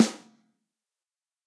Snare14x65CustomMahoganyRightHandMid
Sampled from a custom 14inch by 6.5inch mahogany single ply (steambent) snare. Mics used were a shure sm57 close mic, and neumann km 84s in an x-y position as close as I could get to my head, to best approximate the sound the drummer hears from his perspective. Available in left and right hand variations with four increasing velocities; soft, mid, hard, and crack.
drums, acoustic